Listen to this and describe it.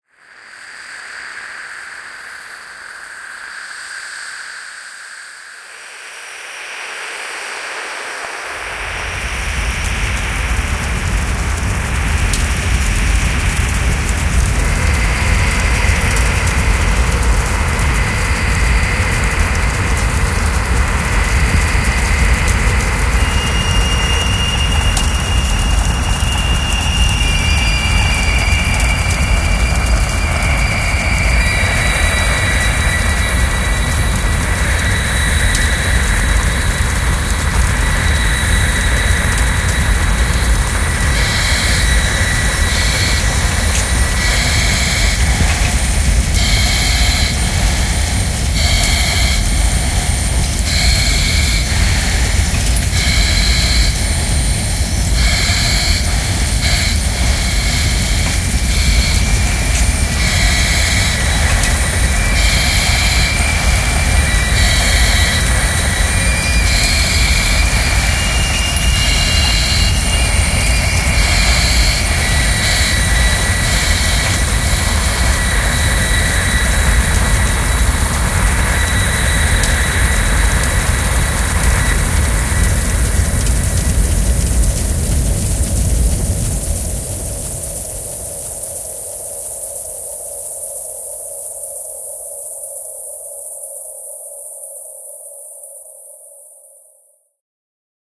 Space alien passing through a doomed vessel.
A short one and half minute intro into the dimension of a space alien passing through a damaged hull section of a doomed vessel.
Hey I have no problem w/ you using it as long as you link it back in your source credits:
Bryan Little
Enjoy and Have Fun!
sound, game, sounding, fx, fire, space, fiction, effects, science